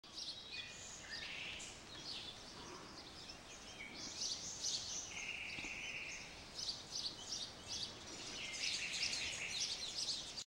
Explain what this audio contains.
Trying to do sounds of morning
ambient, foley, Morning